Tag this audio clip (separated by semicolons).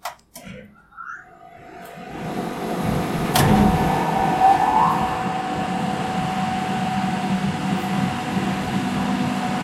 Buzz; electric; engine; Factory; high; Industrial; low; machine; Machinery; Mechanical; medium; motor; Rev